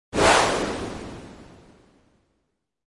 Woosh Medium 03
White noise soundeffect from my Wooshes Pack. Useful for motion graphic animations.
fx, swish, wave, soundeffect, wind, effect, swoosh, swash, whoosh, noise, space, woosh, swosh, fly, future, scifi, wish, sfx, transition